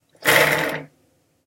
6 Caña de pescar
Fishing Rod Sound
Sound, Rod, Fishing